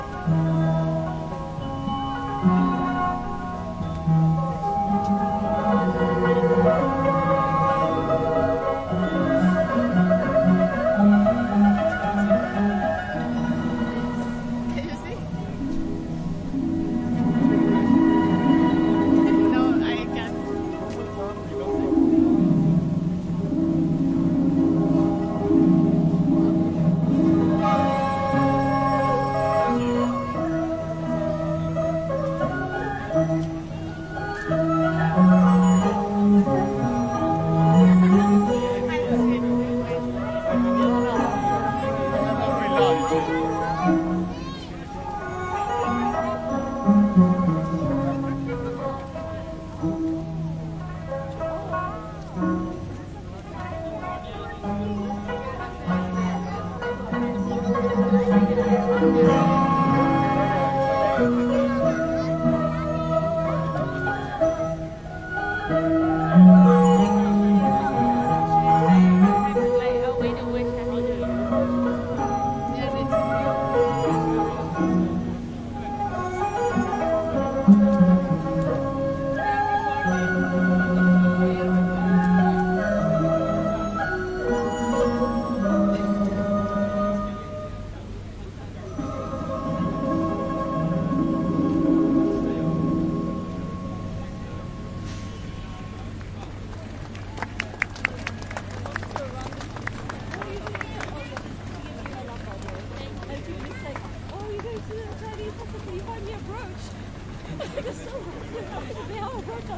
Trafalger Square Chinese New Year (Music Performance)